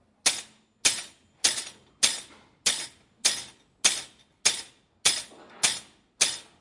Repeated Metal Rattles
Bang, Boom, Crash, Friction, Hit, Impact, Metal, Plastic, Smash, Steel, Tool, Tools